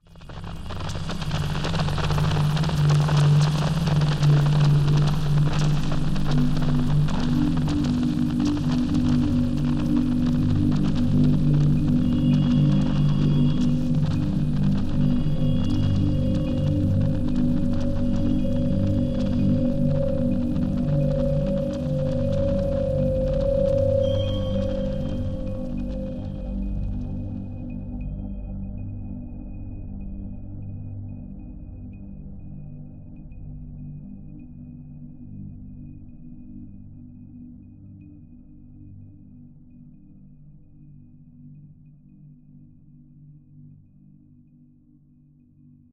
This is a deeply textured and gentle pad sound. It is multisampled so that you can use it in you favorite sample. Created using granular synthesis and other techniques. Each filename includes the root note for the particular sample.